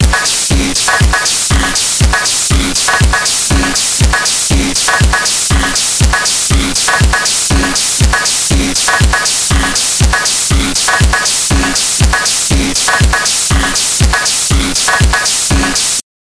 Processing and audio file in a graphics editor.The original sample was converted to RAW and then loaded into a graphic editor (Paint Shop Pro) where I applied motion blur. The processed file was mixed back with the original file.The file was then imported back to a sound editor played and converted to wave. The blur processed file sounds too harsh, that is why I mixed it 50/50 with the original file.On uploading, I realised the processing added a bit of empty space at the end of the sample. This will have to be cut out for the sample to loop properly.This sample is intended to show the feasibility of using a graphics editor to process sound.
rhythm, image2wave